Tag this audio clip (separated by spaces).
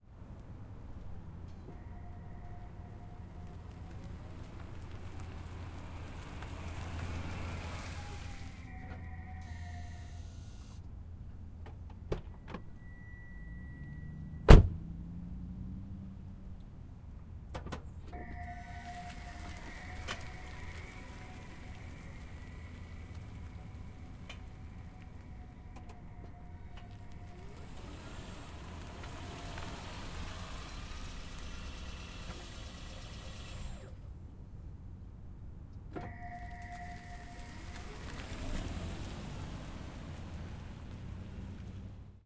close Toyota door open Prius